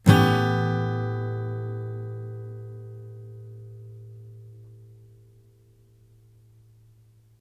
chord Em6
Yamaha acoustic through USB microphone to laptop. Chords strummed with a metal pick. File name indicates chord.
chord, acoustic, guitar, strummed